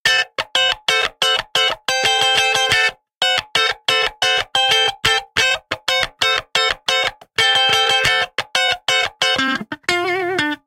Funky Electric Guitar Sample 9 - 90 BPM
Recorded using a Gibson Les Paul with P90 pickups into Ableton with minor processing.